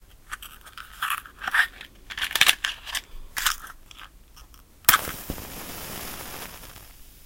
lighting a match02
matches, match, burn, lighting, flame, flames, cigarette, fire, burning